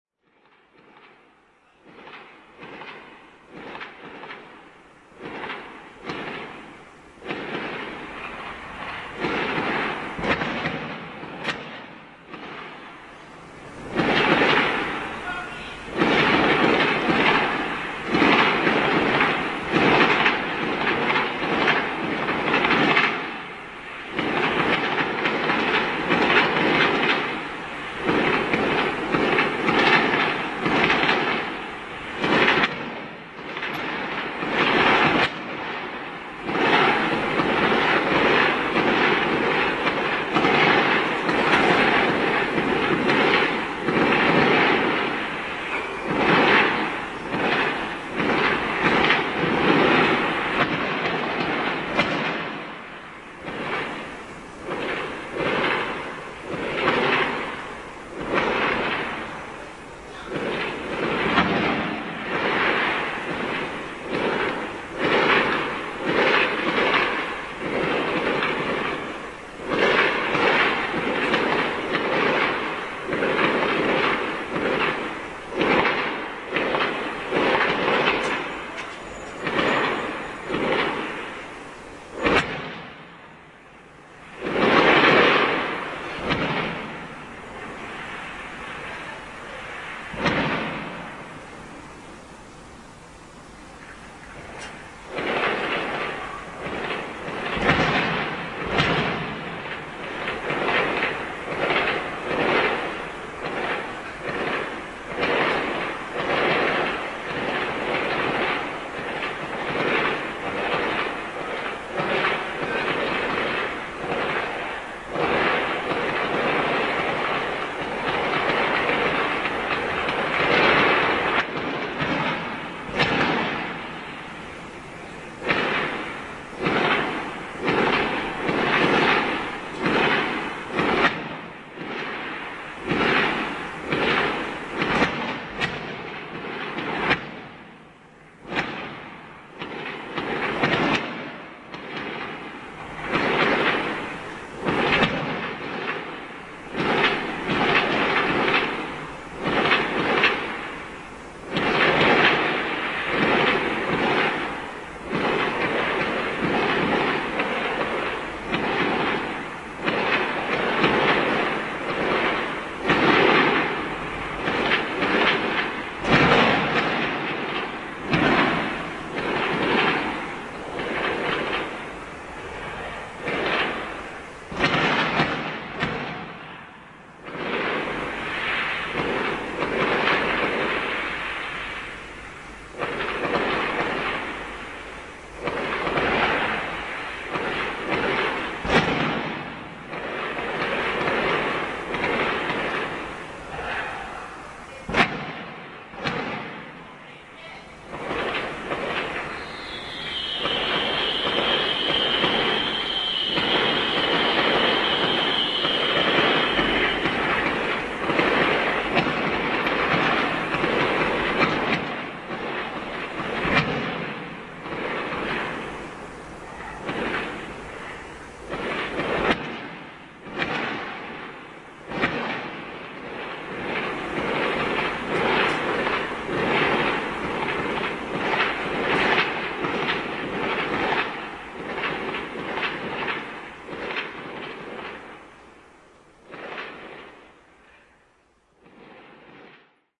05.06.2010: about 21.00. the sound of fireworks that was audible from the center of Poznan (from the Old market where annual St John's faire was opening). The sound recorded from my balcony in the tenement locatet about 1,5 km from the Old Market.
more on: